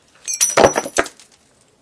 Breaking Glass 4
Includes some background noise of wind. Recorded with a black Sony IC voice recorder.